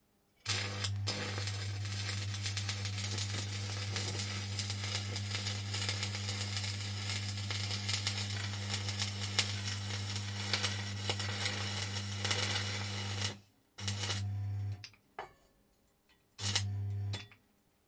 Welding 2 long take II
Welding sounds made by welding with the electric current.